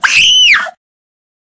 Female Scream 2
female, girl, Yell